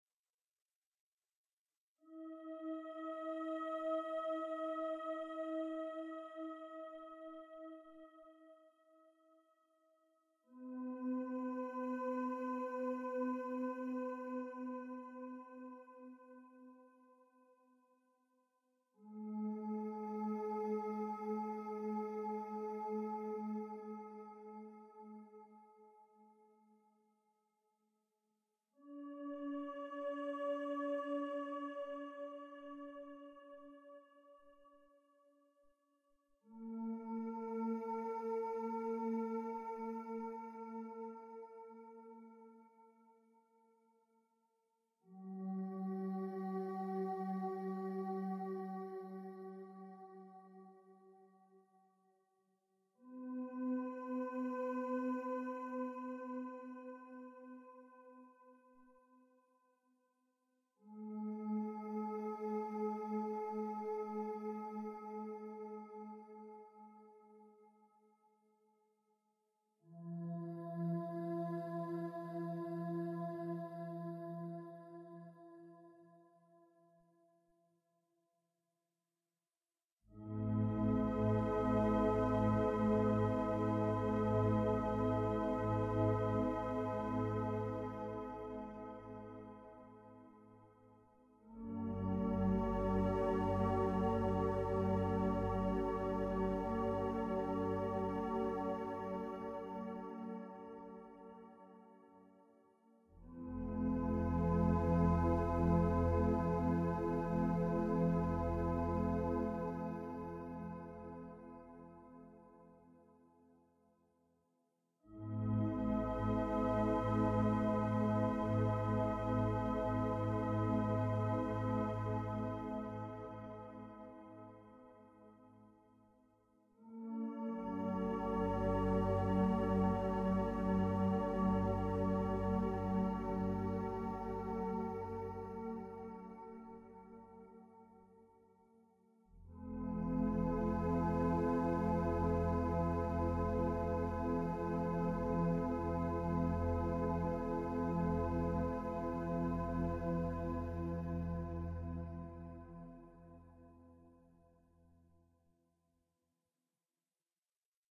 relaxation music #50
Relaxation Music for multiple purposes created by using a synthesizer and recorded with Magix studio. Edited with audacity.
music, ambience, relaxation, voices, atmosphere, synth, electronic